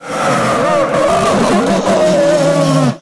Ghost Moan 1
From my horror game "Black Rose". Ghost (Sullivan James) letting out a loud moan. This clip is heard in the "Visitation Room" when he sits up in his coffin.
voice, scary, moan, james, creepy, groan, ghost, black, rose, horror, sullivan, monster